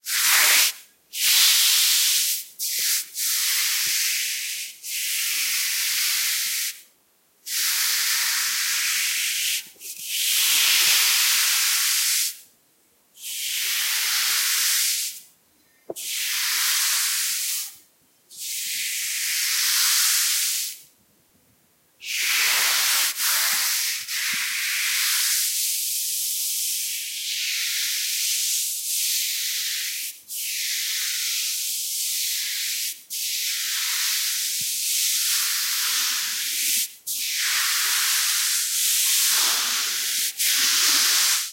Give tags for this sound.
cloth field-recording friction stroking-over sweeping

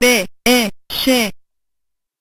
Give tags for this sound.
circuit bent glitch bending

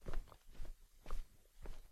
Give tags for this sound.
carpet foley footsteps shoes walk walking

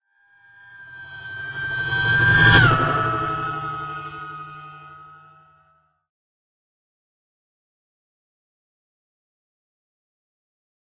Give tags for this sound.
fly-by flyby pass sci-fi pass-by ufo spaceship

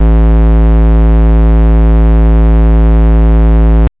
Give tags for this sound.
square fuzzy chiptune synth

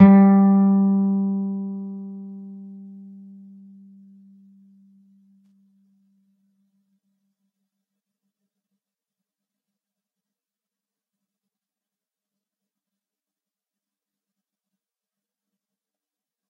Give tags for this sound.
acoustic guitar nylon-guitar single-notes

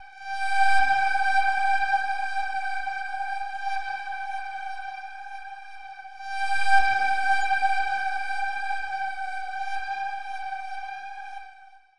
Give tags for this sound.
bees electronic experimental loop melody

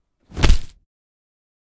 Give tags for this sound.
hit punch schlag slap stroke

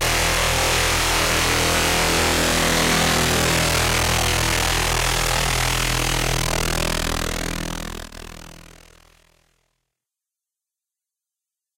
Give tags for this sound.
aggressive dare-26 data-bending databending harsh image-to-sound ominous synth

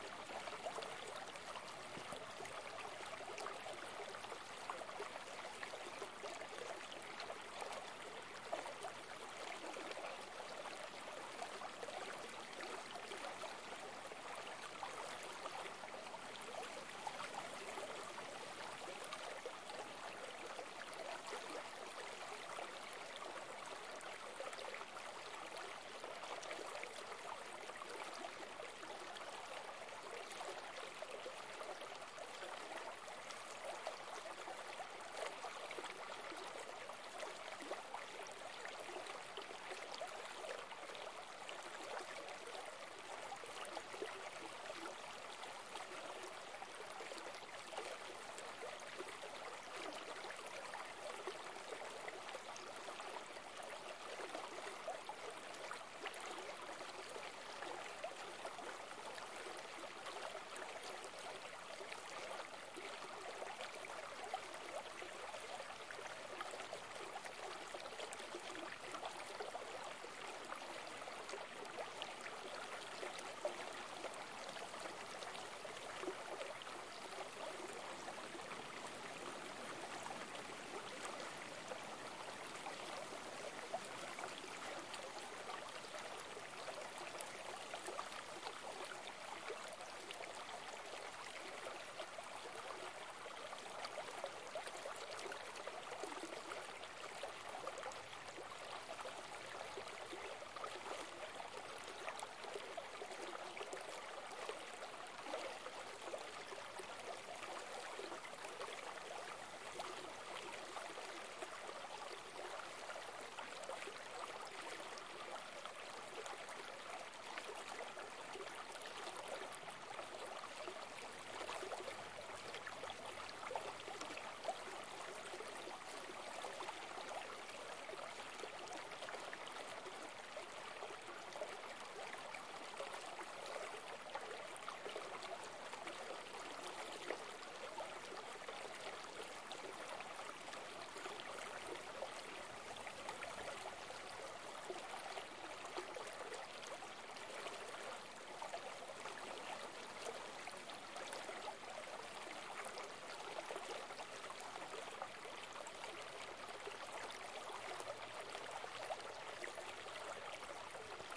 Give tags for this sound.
ambient field nature recording

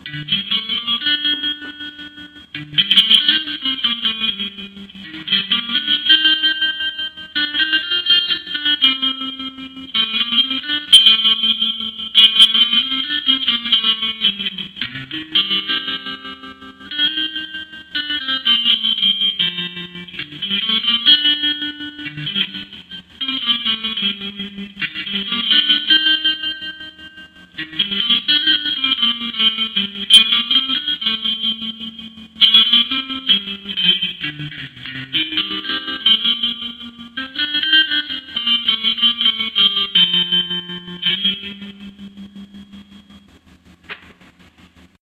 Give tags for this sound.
Improvising Guitar

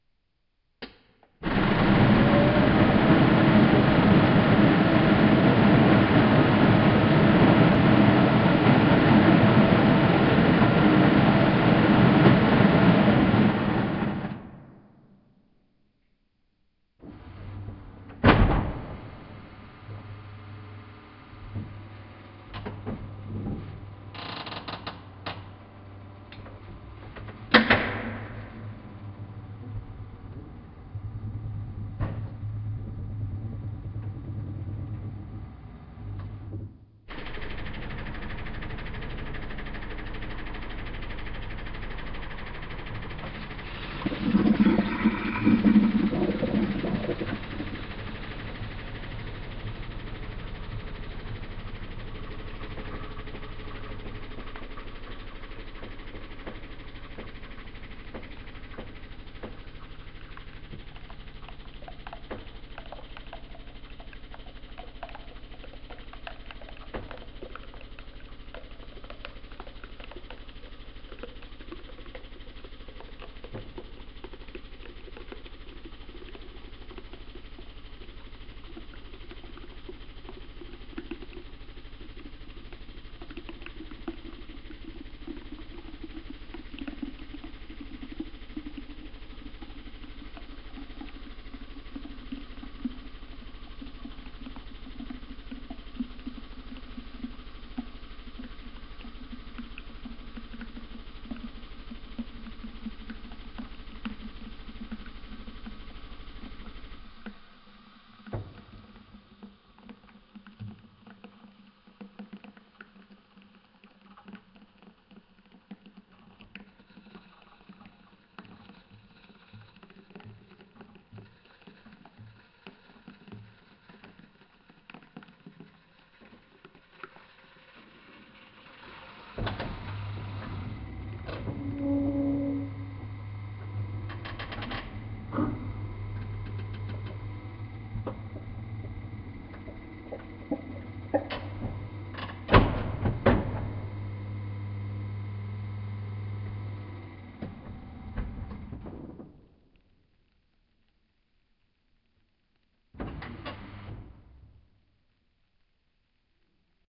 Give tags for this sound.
buzz clank coffee-machine electro-mechanics

